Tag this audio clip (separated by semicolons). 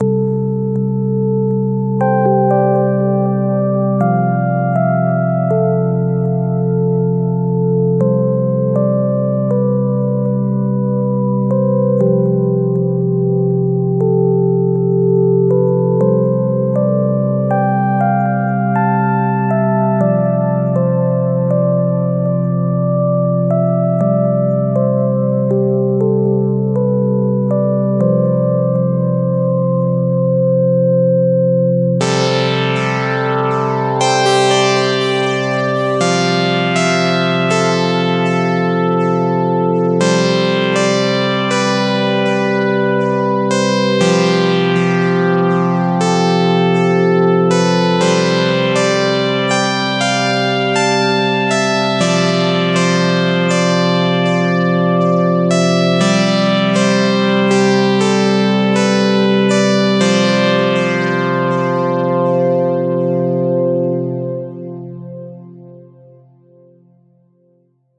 background; free; electronic; homecoming; soundtrack; film; calm; movie; keys; soft; game; soundscape